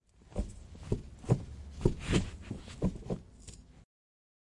07 - Shift lever
Close perspective, inside
CZ, Czech, Panska, Pansk